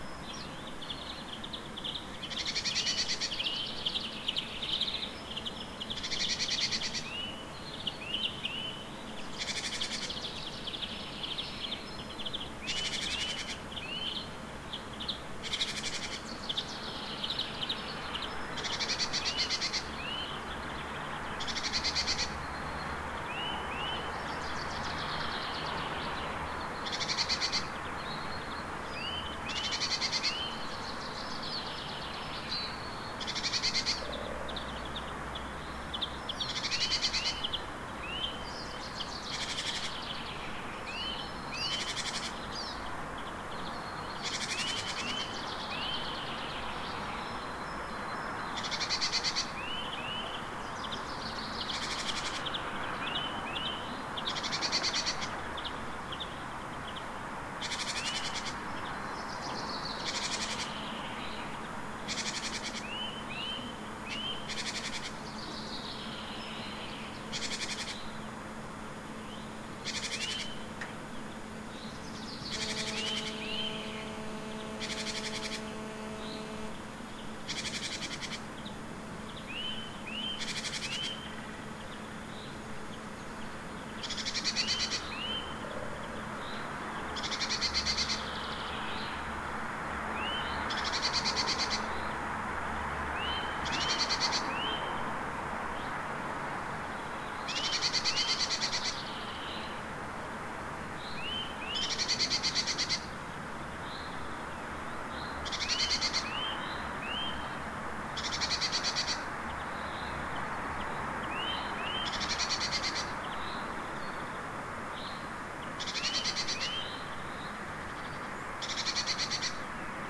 Recorded with Zoom H2 at 7:30 am. Near street-noice with several birds